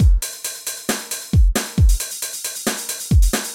16hh135groove
It was created and exported with the Native Instruments Maschine and its Samples.